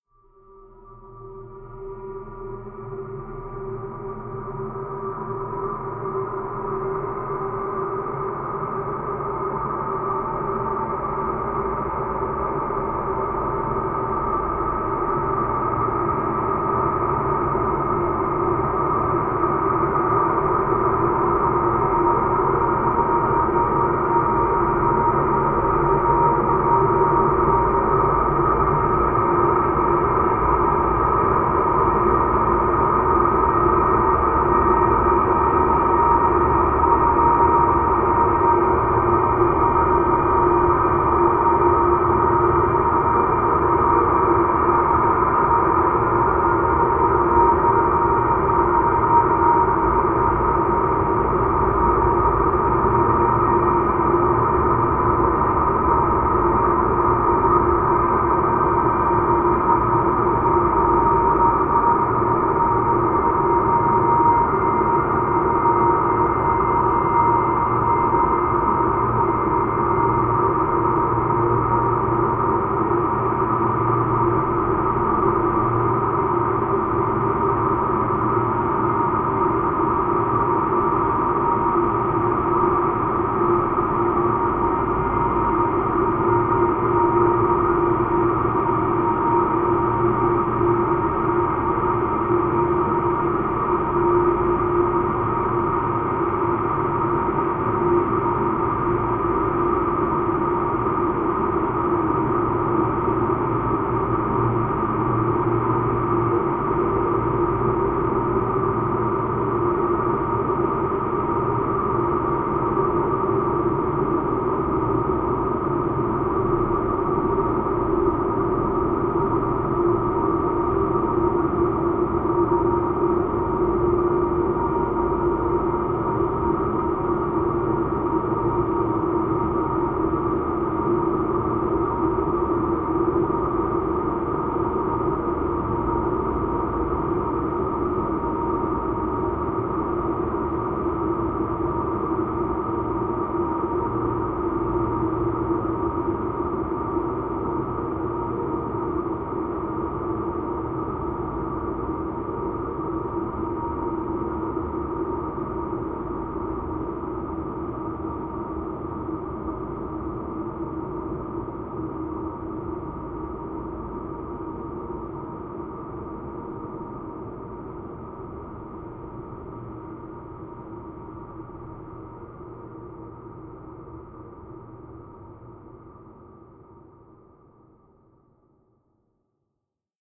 Melodrone multisample 16 - Metallic Interference - G#3
This sample is part of the “Melodrone multisample 16 - Metallic Interference” sample pack. A more industrial atmosphere with slow evolution. The pack consists of 7 samples which form a multisample to load into your favorite sampler. The key of the sample is in the name of the sample. These Melodrone multisamples are long samples that can be used without using any looping. They are in fact playable melodic drones. They were created using several audio processing techniques on diverse synth sounds: pitch shifting & bending, delays, reverbs and especially convolution.
atmosphere, ambient, drone, multisample